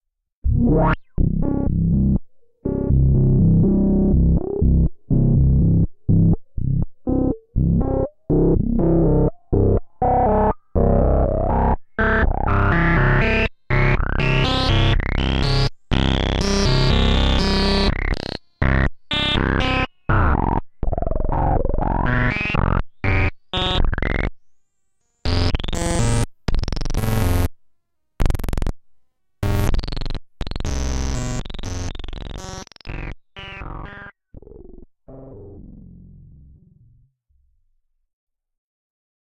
This sample was created using a Moog Sub Phatty and recorded into Ableton Live.
additive, digital, distorted, distortion, effect, fx, modulation, noise, sfx, sound-design, synth, synthesis